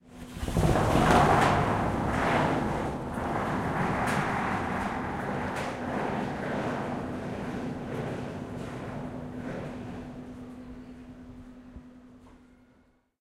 The microphone doesn't move, plagazul runs next to a wavy metal construction work sheet and touches his hand to it.
construction, metal-sheet, resonate, rubbing